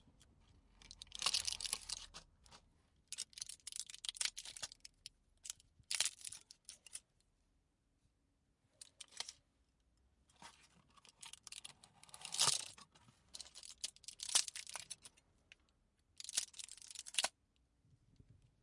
Popping pills 02
Panado pills, popping out of plastic and aluminum container
crunching, panado, popping, clicking, medicine, crackling, OWI, pills